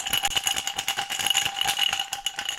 Ice Cubes Glass Shake 01
Ice cubes being shaken in a glass
bar, drink, glass, ice-cubes, restaurant, shake